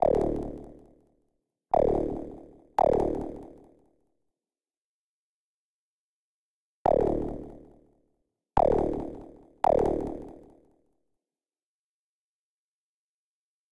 140 bpm ATTACK LOOP 1 ELEMENT 5 mastererd 16 bit
One of the elements of the complete loop. The sound resembles an acidic
bass sound. Mastered down to 16 bits using mastering effects from
Elemental Audio and TC.
techno, 140bpm, drumloop, beat